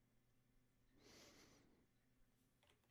light short sniff
One short sniff by human aged 20
nose, smell, sniff